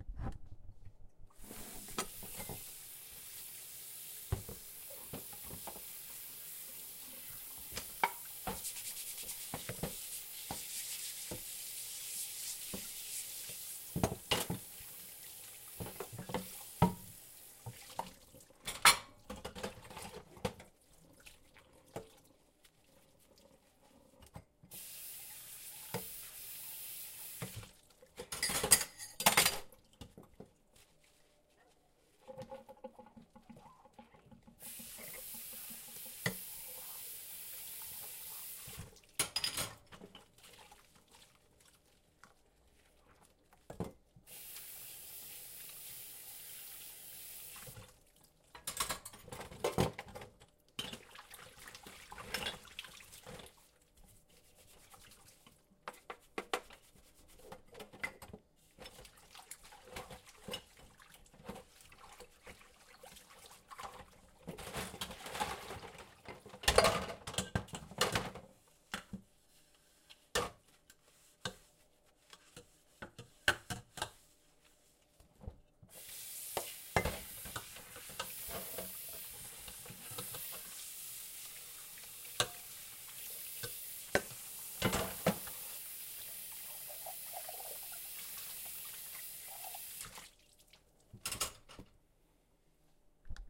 doing the dishes
Hand-washing the dishes in a stainless-steel sink
Recorded with zoom H4N . Unprocessed